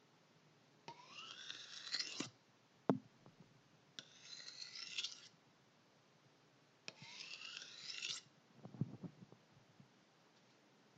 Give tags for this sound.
metal; slide

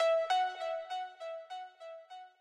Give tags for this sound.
Lead; Music-Based-on-Final-Fantasy; Pluck; Sample; Synth

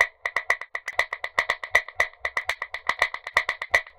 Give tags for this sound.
brazil; cuba; pan; Clav; delay; Percussion; afro

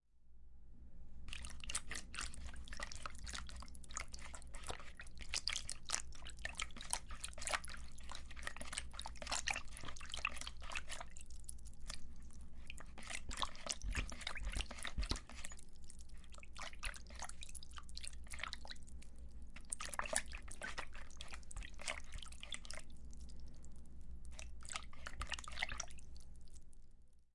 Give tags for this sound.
lago; estanque; agua

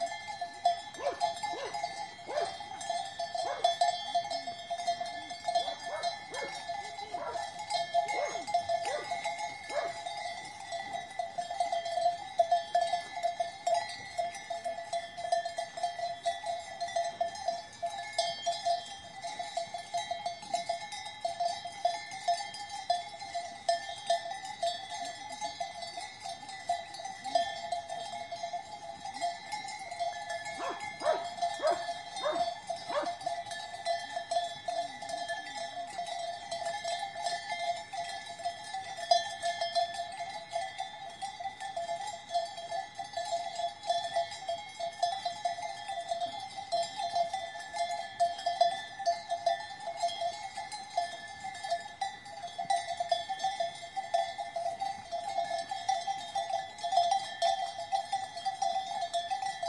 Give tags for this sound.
cowbell; animal; cow

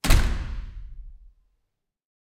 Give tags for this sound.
close; door; punch

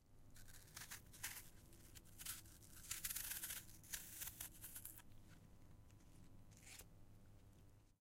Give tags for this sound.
apple; sound-design; sounddesign

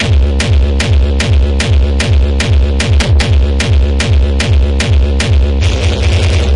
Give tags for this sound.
distortion; distorted; bassdrum; techno; beat; kickdrum; bass; gabber; hardstyle; hard; kick; hardcore; bass-drum; drum

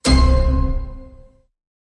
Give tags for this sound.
end; film; wrong; fanfare; game; movie

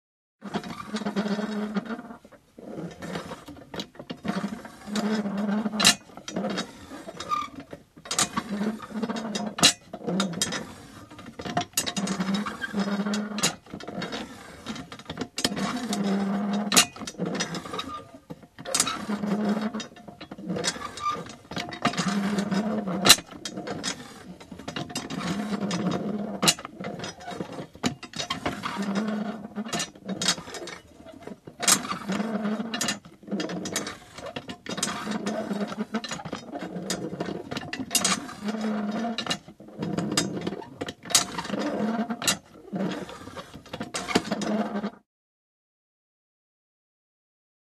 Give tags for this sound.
old-waterpump; mechanical; pump; metal; grind; mechanics; machinery; metallic; squeak

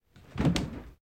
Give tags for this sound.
house-recording; refri; object

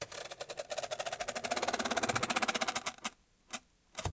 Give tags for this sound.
brush; hits; objects; random; scrapes; taps; thumps; variable

